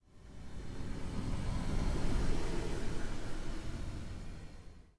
Instant Wind
A momentaneous wind sound.
Created using Audacity.